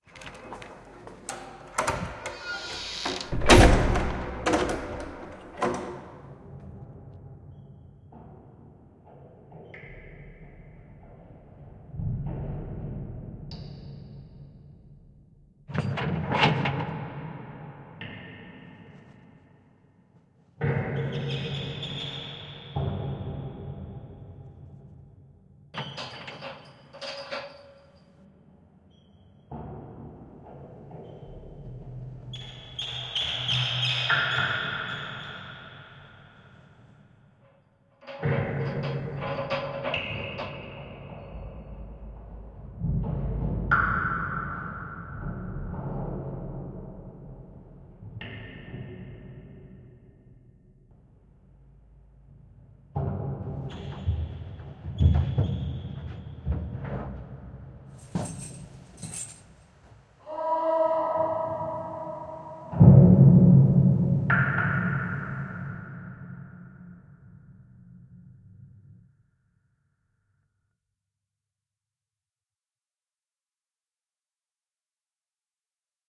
Porte cachot+prison
Here is a jail (vintage) soundscap that i made for a puppet show....sounds are recording with a yham C24 & a SP B01 ....layered and edited in ableton live